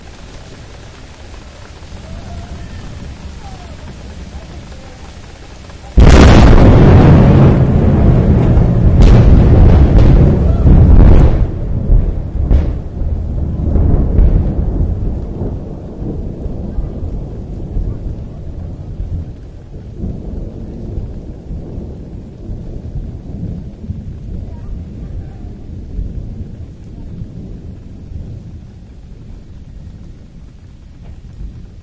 big-thunder
Warning! This is the loudest thunder I've ever heard. It was extremely close to me when I was recording it. Perhaps 200-400 meters. The wave file is severely clipped, so watch your speakers.